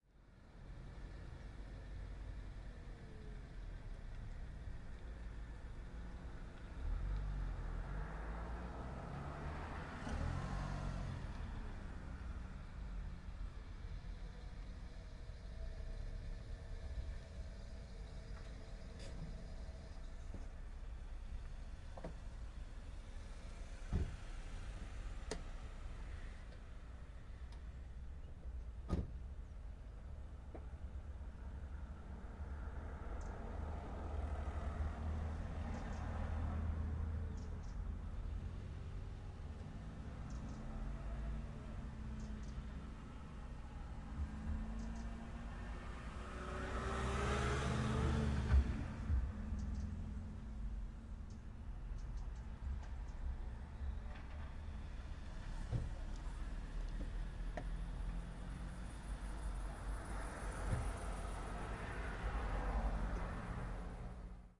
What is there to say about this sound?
Carpark ambience
Cars slowly driving round a car park. Petrol and diesel cars can be heard, something that might not be true in a few years :)
Zoom H5 internal mics.
ambiance, ambience, ambient, atmosphere, car, carpark, cars, city, closing, diesel, doors, driving, engine, engines, field-recording, lot, opening, park, parking, petrol, slow, traffic, uk